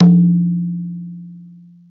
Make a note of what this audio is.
maadal-01-dhin
Recorded by the author! धिन -
Microphone: lavalier microphone
Side of Maadal: Larger Side
Fingers used: Index, Middle, Ring, Little
Hit type: open sound (leave the membrane right after hitting it)
Wikipedia Introduction:
B-Scale, dhin, maadal